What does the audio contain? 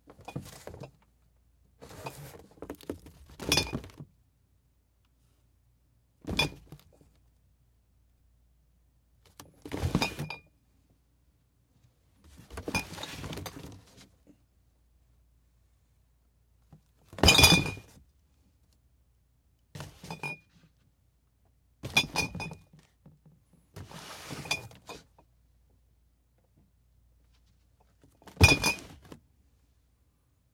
Box Of Bottles Movement FF239
low pitched moving and sliding of a box, high pitched clanking and tinging of glass bottles in box as it is moved. glass on glass.